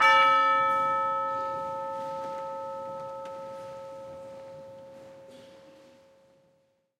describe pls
TUBULAR BELL STRIKE 010
This sample pack contains ten samples of a standard orchestral tubular bell playing the note A. This was recorded live at 3rd Avenue United Church in Saskatoon, Saskatchewan, Canada on the 27th of November 2009 by Dr. David Puls. NB: There is a live audience present and thus there are sounds of movement, coughing and so on in the background. The close mic was the front capsule of a Josephson C720 through an API 3124+ preamp whilst the more ambient partials of the source were captured with various microphones placed around the church. Recorded to an Alesis HD24 then downloaded into Pro Tools. Final edit in Cool Edit Pro.
tubular, live, percussion, chime, ringing, orchestral, chiming, bell